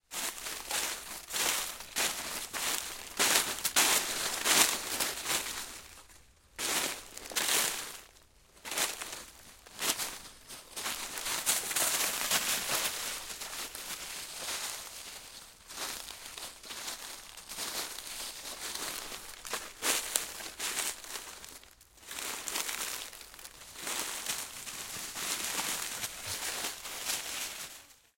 steps on leaf 2 16
Recorded on March in Madrid close to Jarama river.
Recorded with a Rode NT4 on a SoundDevices 702.
dogs, tree, poplar, jarama, forrest, leaf, spring, madrid, ash